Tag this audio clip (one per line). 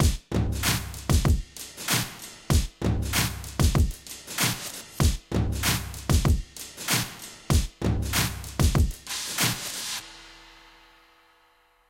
processed
hip
hop
drum
beat